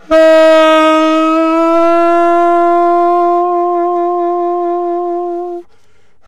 Tenor Sax f3
The format is ready to use in sampletank but obviously can be imported to other samplers. The collection includes multiple articulations for a realistic performance.
sampled-instruments, sax, vst, woodwind, tenor-sax, jazz, saxophone